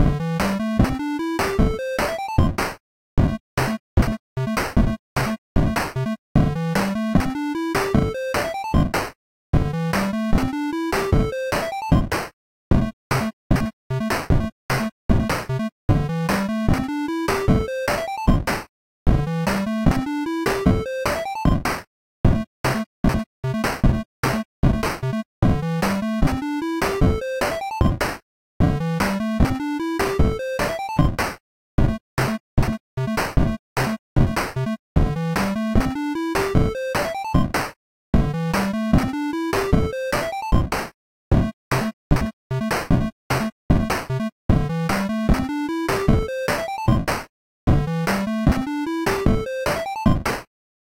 Beep Scale
This is just a weird song I put together in 1 minute. It repeats OFTEN. I made it using BeepBox.
Thanks!
Beep, Electro, Loop, Short, Techno